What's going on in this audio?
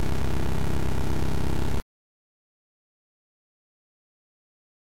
A retro fart that I made for a game that I'm making for the GBJam, Guac-a-mole.